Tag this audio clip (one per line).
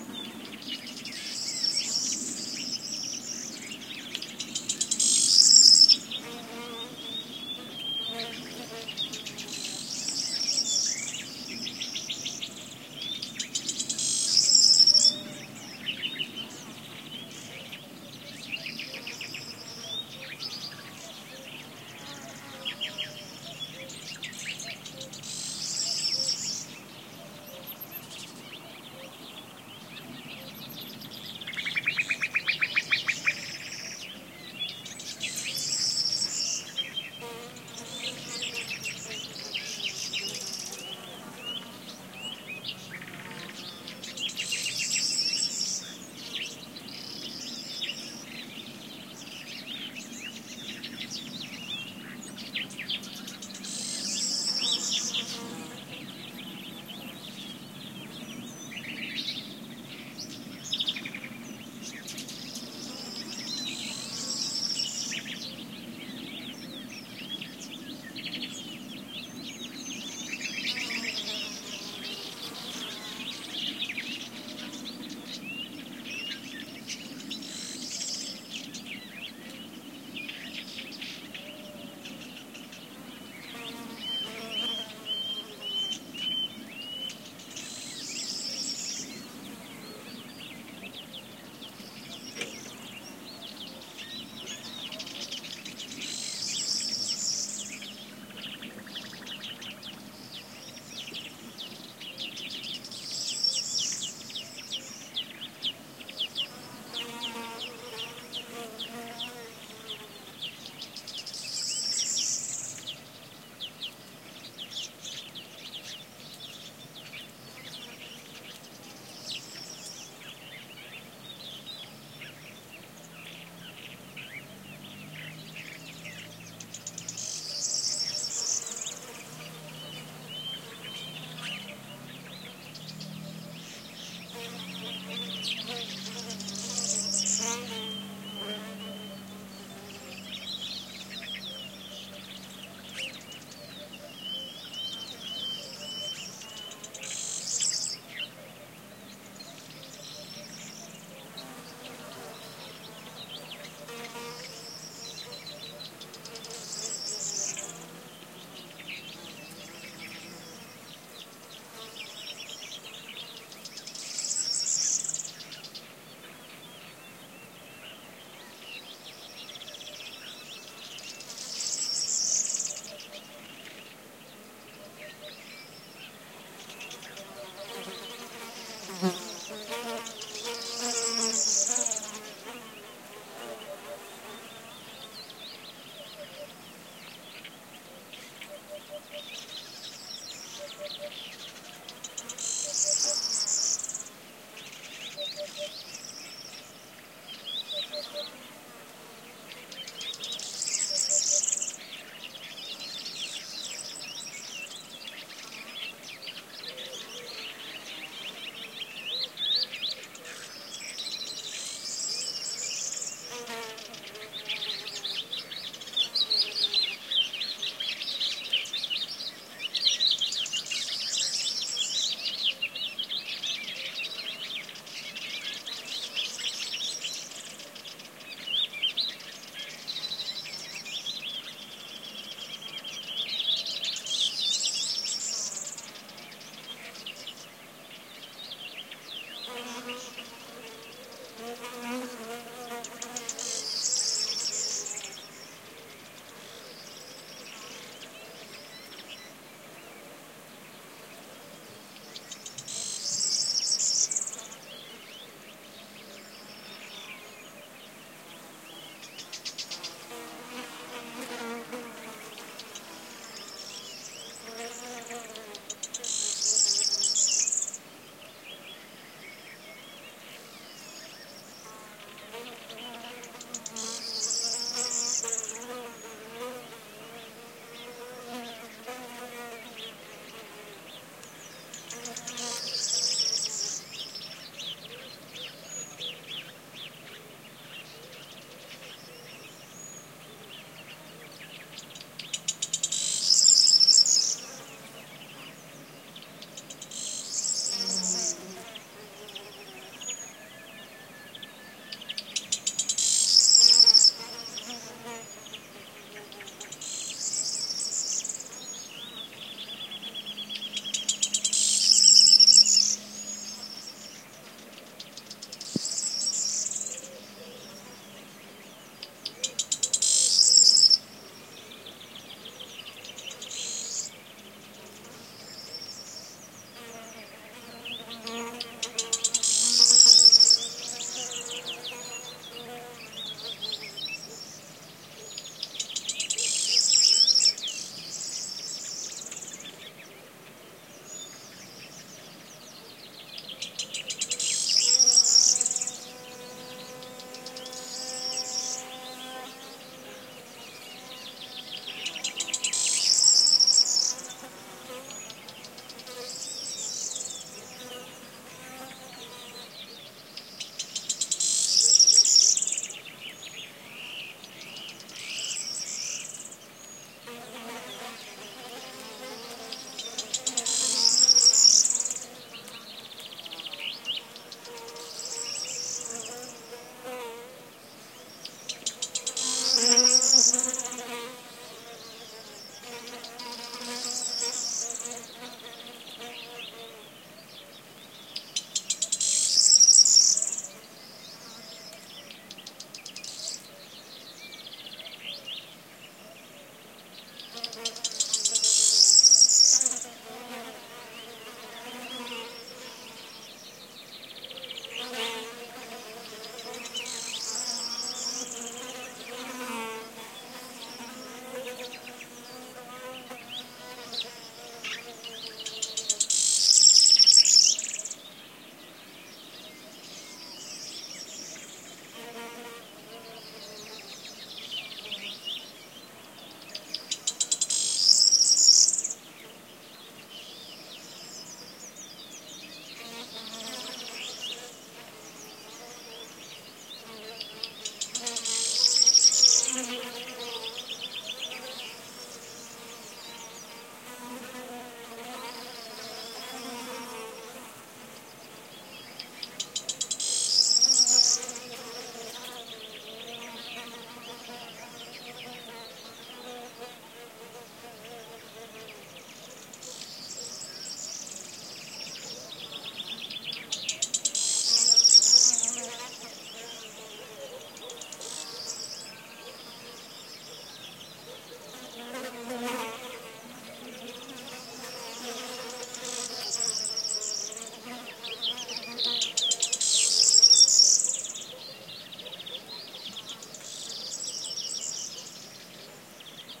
Green-woodpecker
spring
Chaffinch
ambiance
mediterranean-forest
Cuckoo
bees
Alentejo